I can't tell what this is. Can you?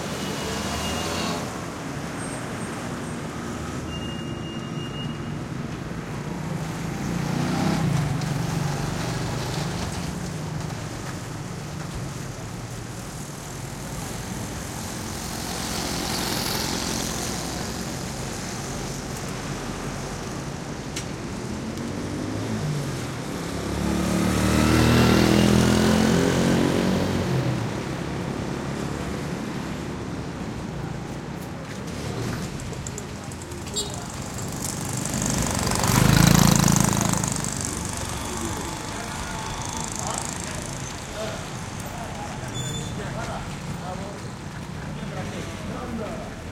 street busy scooters, people, Ouagadougou, Burkina Faso, Africa
Africa, busy, mopeds, people, scooters, street